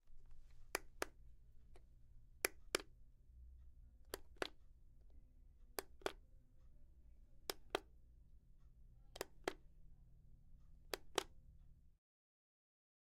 4-Pressed button
Pressed, button, click